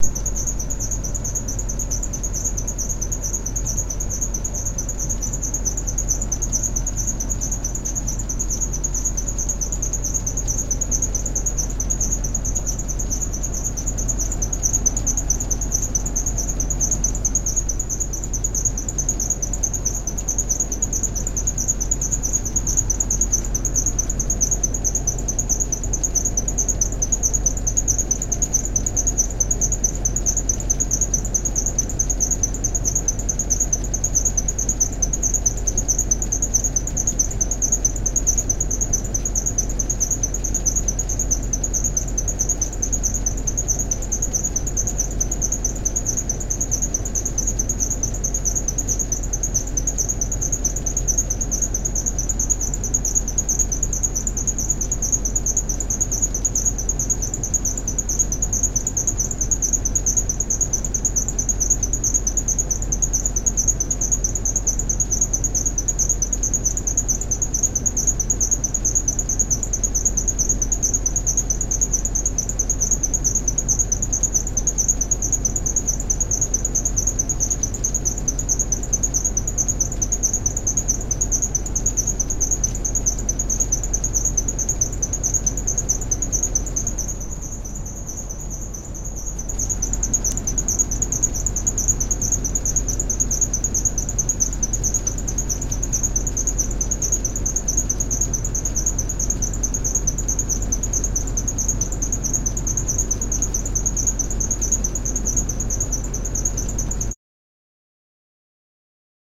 Industrial fan 3
Big industrial fan recorded by Lily Kinner.